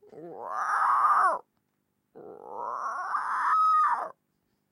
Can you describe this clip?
I dont'n know what it can be, maybe monster of little dino?
cheep, female, dino, screech, dinosaur, basilisk, monster, squawk, croak